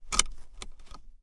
sons cotxe tensor cinturo 2011-10-19
car, field-recording, sound